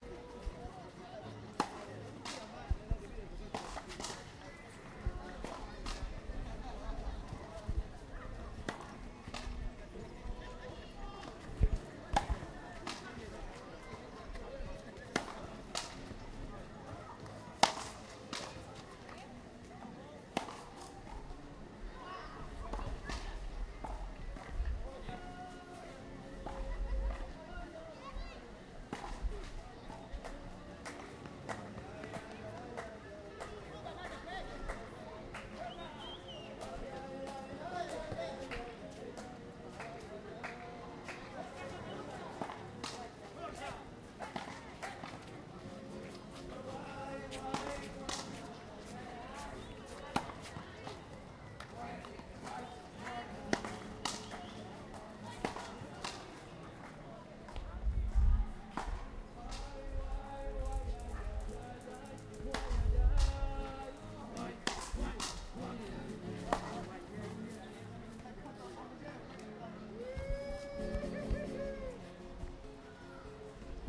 fronton y musica en el clot
Recorded by Maria Jose Arraiza
Latino youth playing fronton in the Clot de la Mel, while his companions accompany the game with a traditional song.
Recorded with a Zoom H1 recorder.
people, america, culture, guitarra, musica, latina, elsodelascultures, pelota, guitar, fronton, music, cultura, gente, ambience